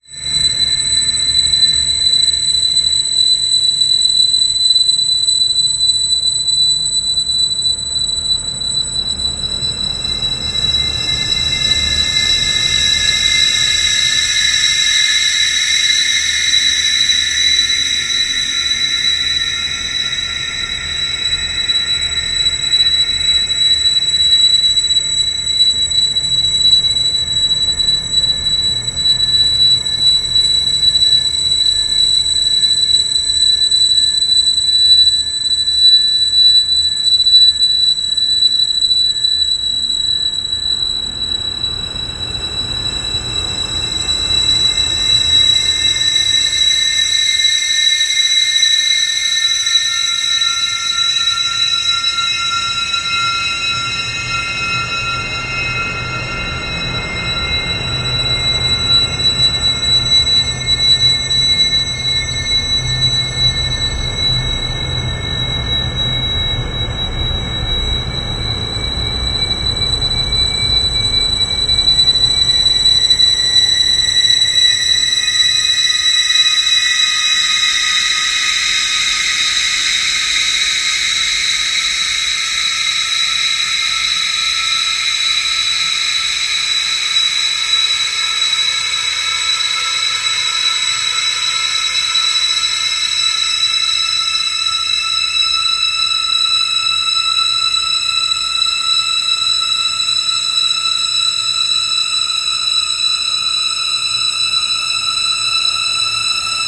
pauled bridge Horror Texture
Pauled a metal sound and created a long dark texture
Ambiance, creepy, haunted, horror, metal, pauled, sinister, spooky, suspense, terror, texture, thrill